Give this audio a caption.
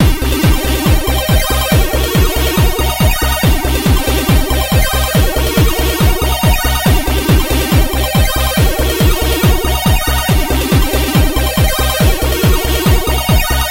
A Commodore64 styled loop.
chip, chiptune, computer, c64, loop, sid, 8bit, pc, commodore, tune, old